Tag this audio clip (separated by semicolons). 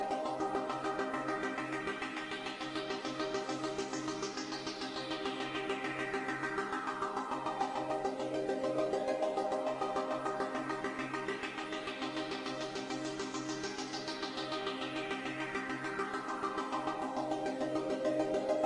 103; 103-bpm; 103bpm; ambient; atmosphere; bpm; chilled; chords; distant; echo; far; motion; out; relaxed; spaced; strings; time